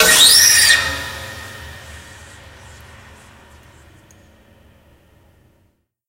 a big crash